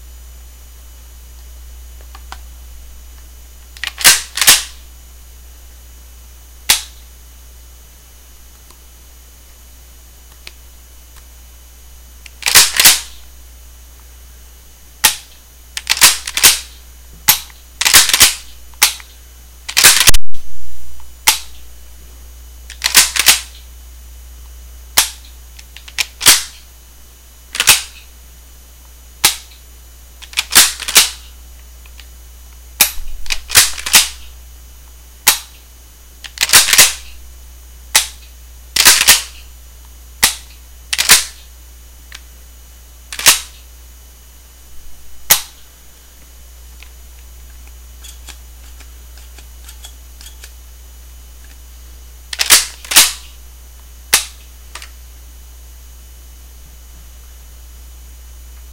Mossberg 500 Shotgun handling
A Mossberg 500 shotgun being handled. I used a small desktop mic (not sure of the brand name, but it was pretty cheap), recording directly into my computer. Recorded in a small room. Cocking, dry-firing, etc. included on the recording. To load it, I used hulls (shells that had already been fired), that had had the ends cut off (to fit in the gun).